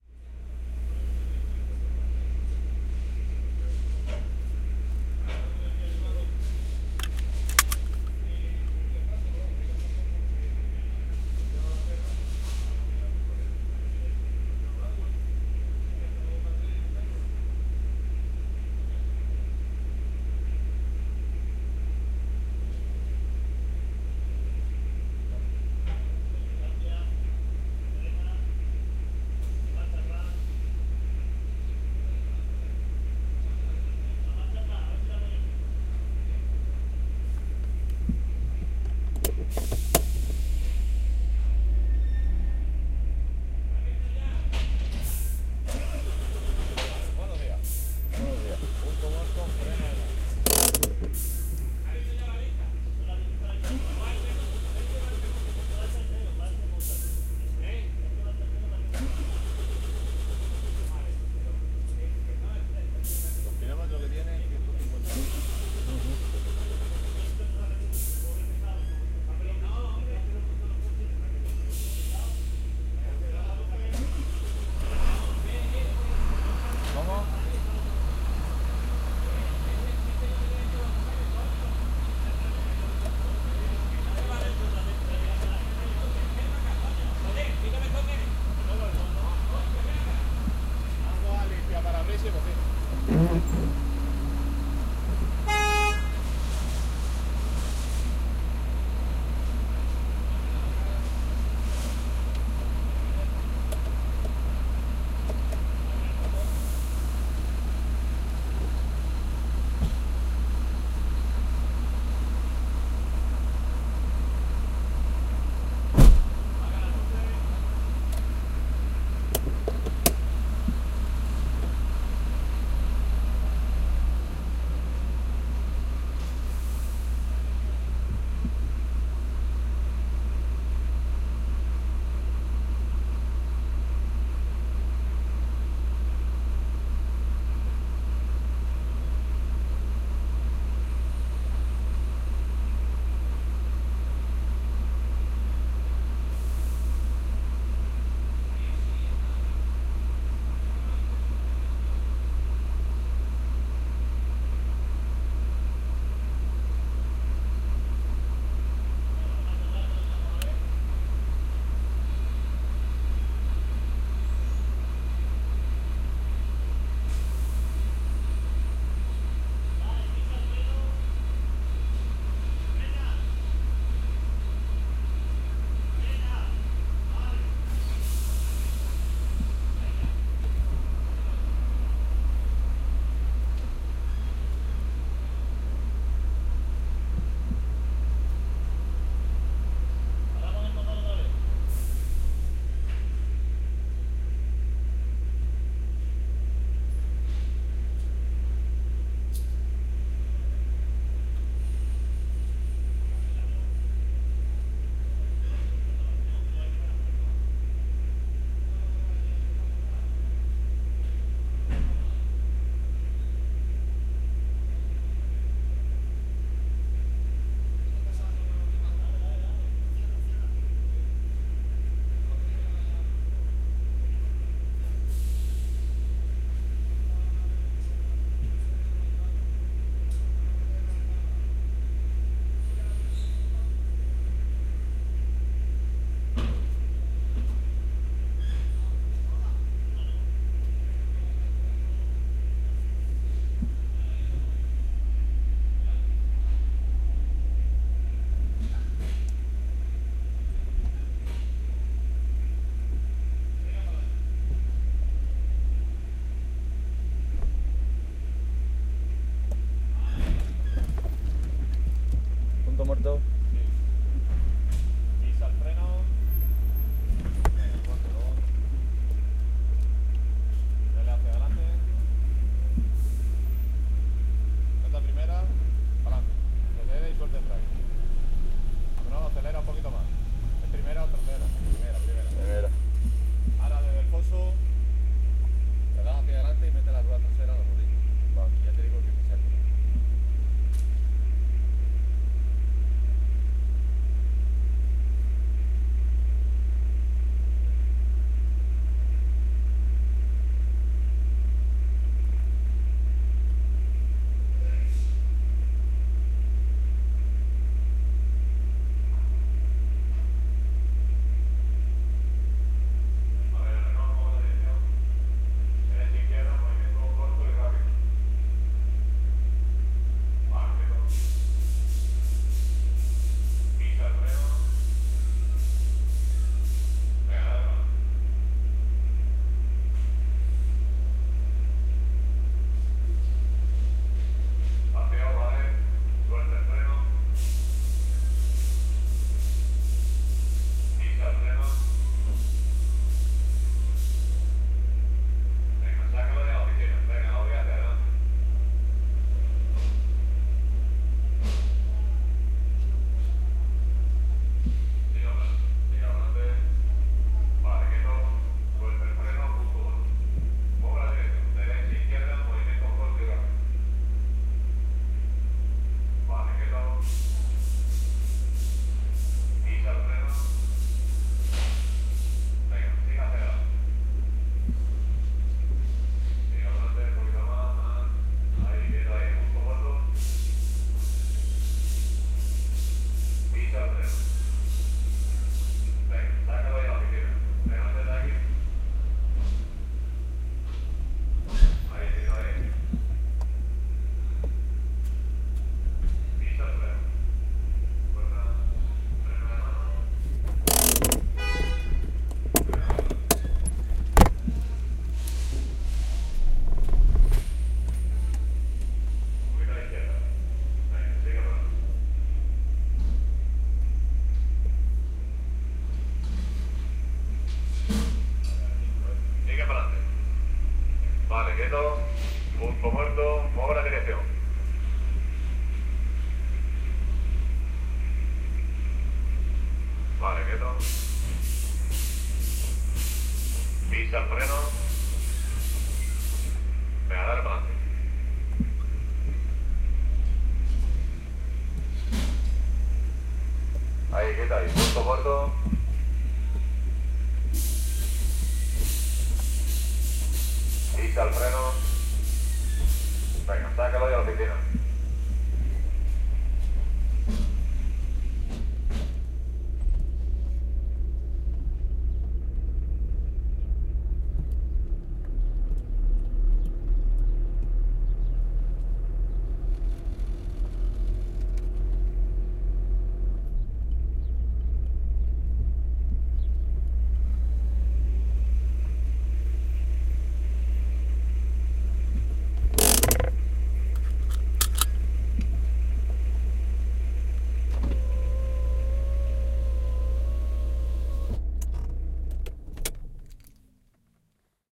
0238 Itv car
Car engine, seatbelt, truck, brake. Car in the technical inspection. People talking in Spanish. Horn.
20120326
engine, spanish, field-recording, car, spain, caceres, horn, voice